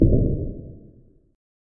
End Sound
A random sound I created for one of my animation. I really don't know what kind of sound do you call it but it is usually used when having a "dramatic" label. Well, whatever that is. Nevermind.
This sound was achieved by recording myself pounding my table once and slowed it down in Audacity while adding a bit reverb to it.
random, end, fx, effect, sound, abstract, sfx